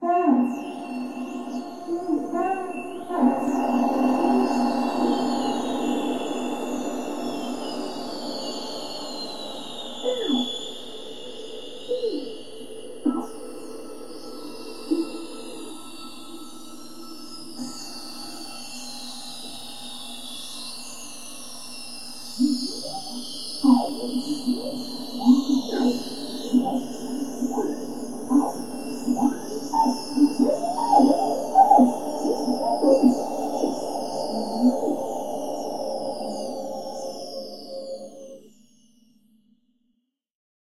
ovary whales on lcd
this drug induced alien female whale love song is again created by pitch shifting and using convolution with my friend mark murray's samples
convolute, nature, processed, space, under-water, weird, whales